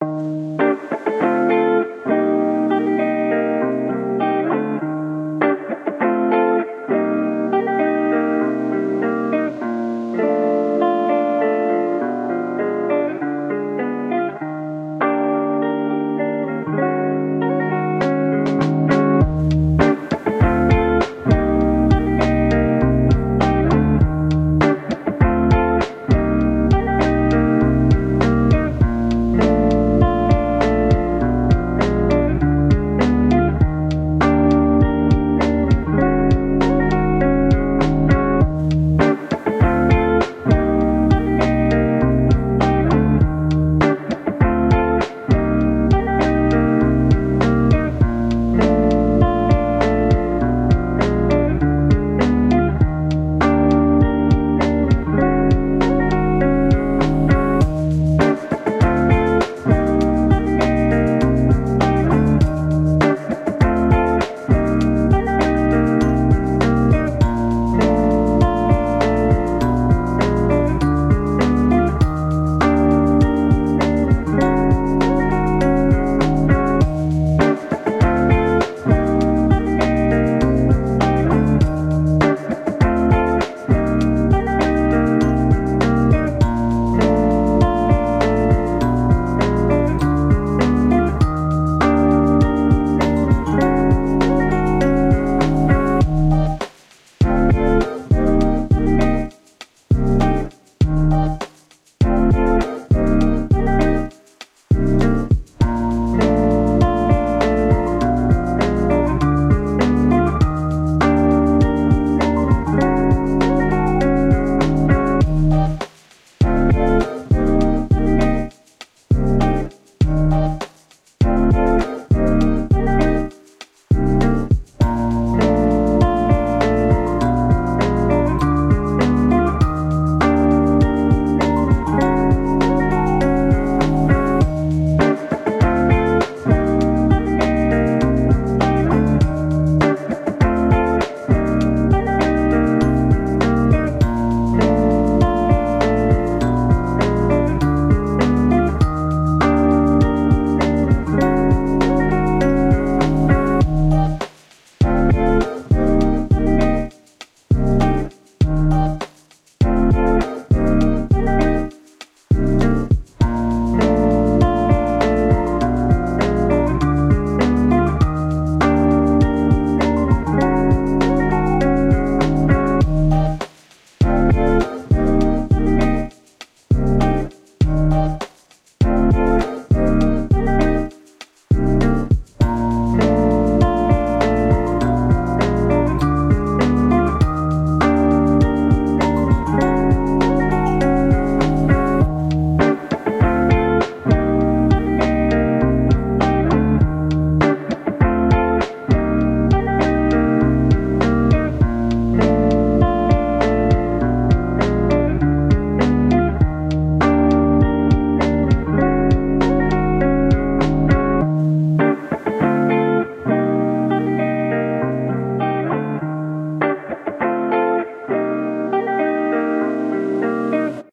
Summer Sound (fixed track 57)
Track: 57/100
Genre: Lo-Fi
Fixed track, too much bass from previous.
backgroun-music, bass, lo-fi, synth